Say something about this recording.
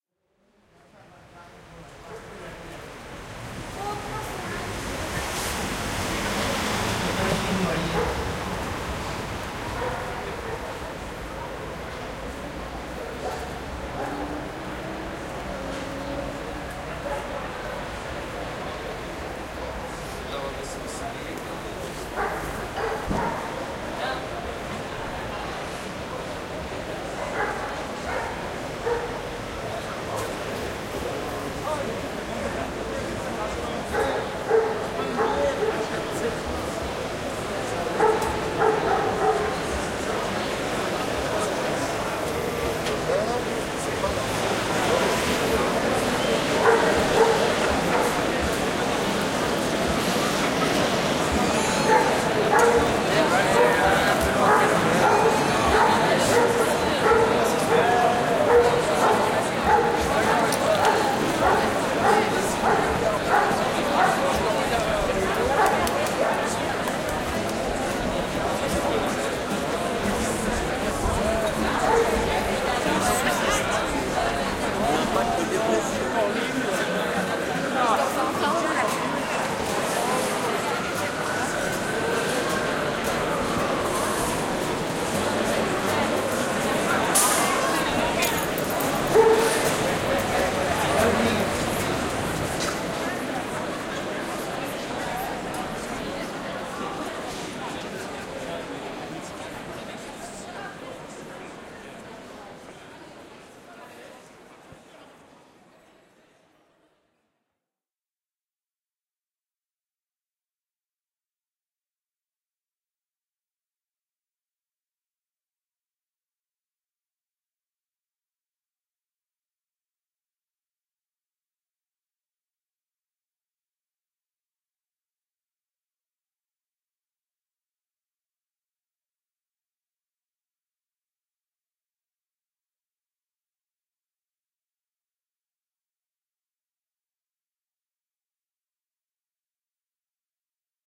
Place Soundsphere
A Bruxelles place, a Wednesday evening.
ambience, ambient, background, field-recording, soundscape